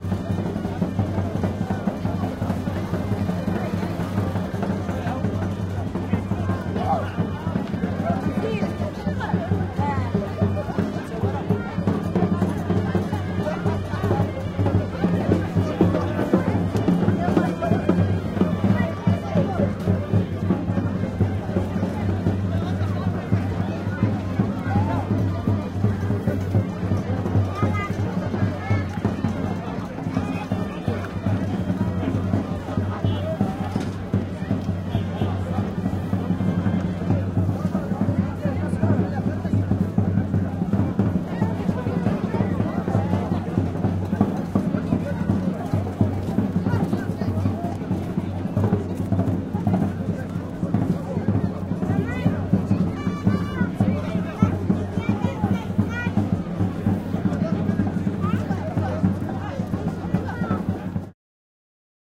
Marrakesh Ambient
African Music Recorded in Marrakesh. Loopable.
Recorded with a Sony PCM D50
arabic, african, loopable, marrakesh